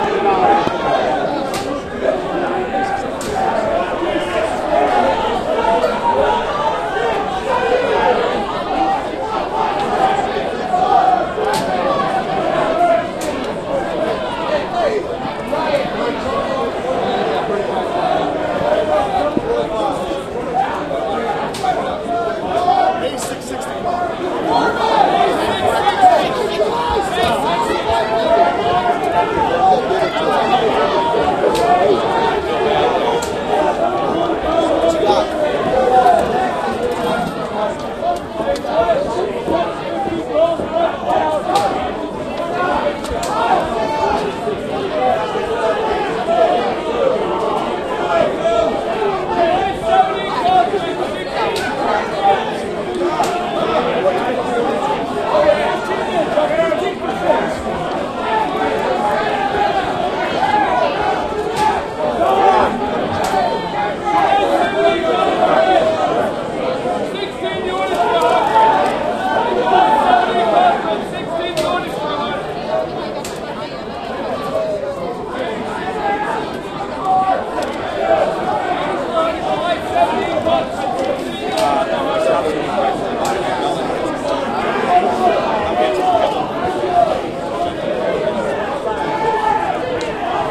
Floor trading4
Ambient sounds from pit traders near the trading floor. Men yelling out puts and call numbers.
floor-trader, futures, open, open-outcry, options, outcry, pit, stock-exchange, trader, trading, yelling